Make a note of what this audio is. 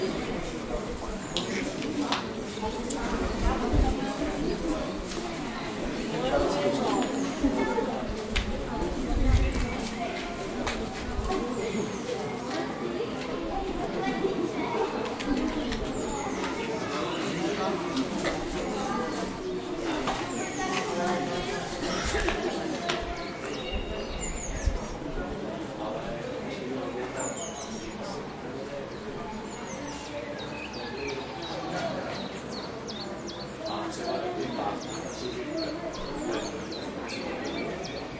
train station outdoor platform birds people
birds, platform, railway-station, train